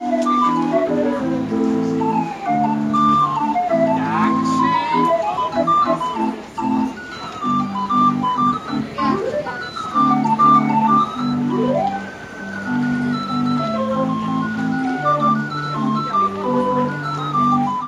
Location: Germany, Limburg, Marktplatz
Year: 2018
Equipment: Xperia Z5 Compact, Field Recorder 9.0